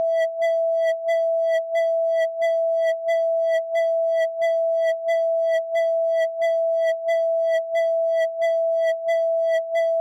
Generate a sound then choose
Waveform: sinusoid
Frequency of the sound: 660Hz
Amplitude: 1
Time of the sound: 10 second
Click effect
Effect: Wah wah
Frequency: 1,5
Depth: 70
Resonance: 2,5
Frequency of wah: 30%
beat, test, class